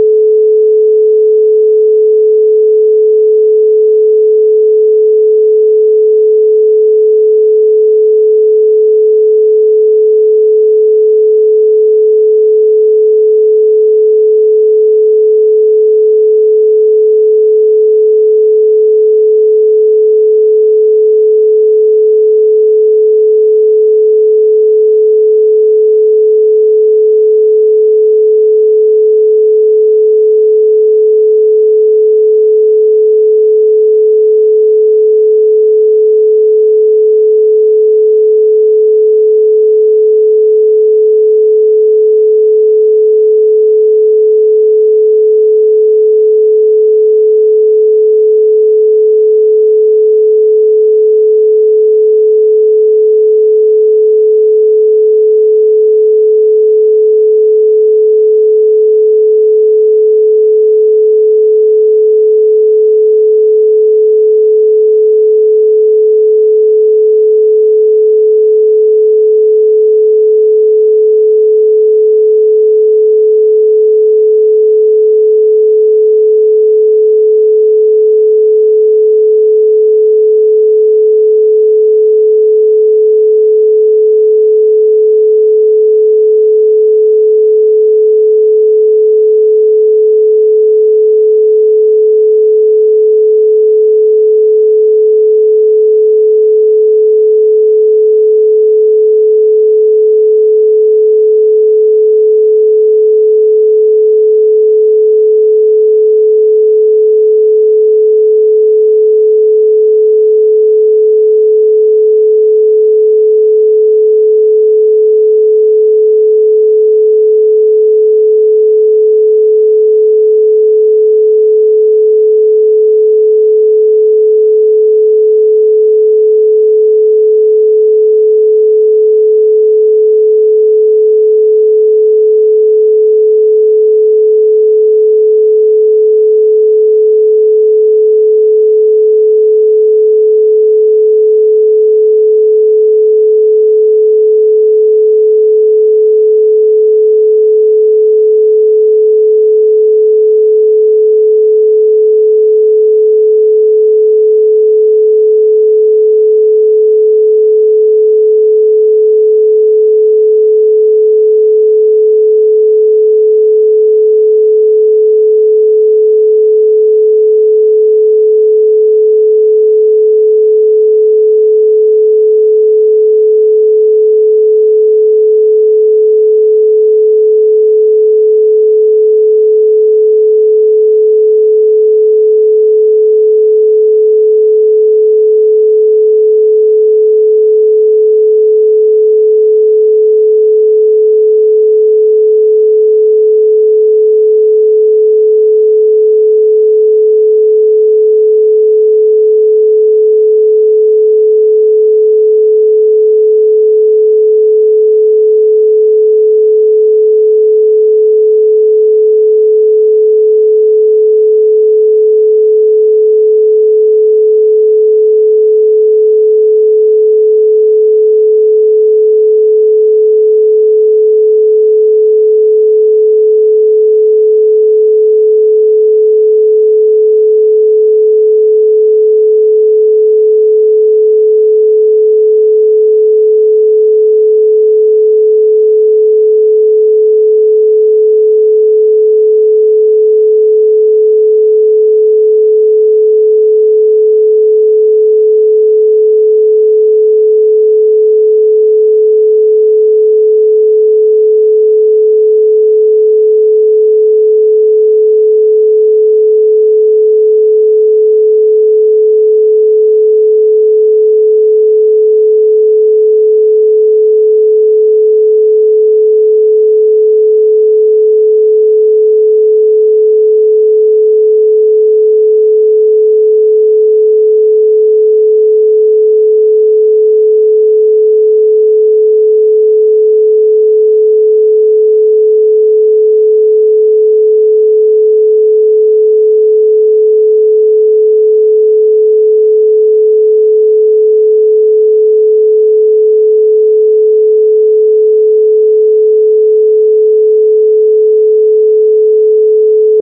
432Hz Sine Wave - 5 minutes
432Hz Solfeggio Frequency - Pure Sine Wave
May be someone will find it useful as part of their creative work :)
tone, gregorian, Pythagoras, solfeggio, frequency, hz